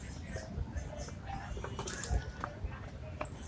Registro de paisaje sonoro para el proyecto SIAS UAN en la ciudad de santiago de cali.
registro realizado como Toma No 05-musica 2 plazoleta san francisco.
Registro realizado por Juan Carlos Floyd Llanos con un Iphone 6 entre las 11:30 am y 12:00m el dia 21 de noviembre de 2.019